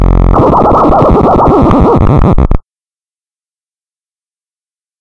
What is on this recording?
79937 Glitchy Scratch
A strange glitch sound. I like to open applications and extensions, etc. in Audacity by importing raw data. This is one of the more interesting results.